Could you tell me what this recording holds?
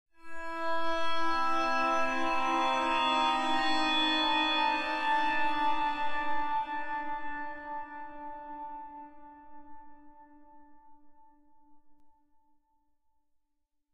A little B-movie melody made with an Alesis Ion and processed through Alchemy. There is much more room for adding your own effects, so have at it!